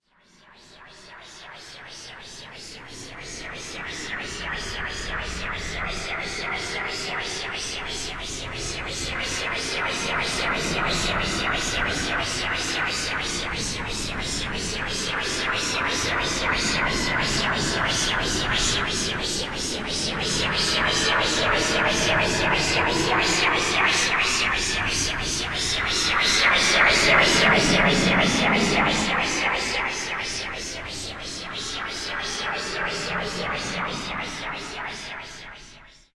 Phaser Effect 002
audacity, Effect, Phaser